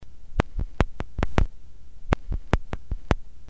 click of a needle on an old record (different again)
click, detritus, field-recording, glitch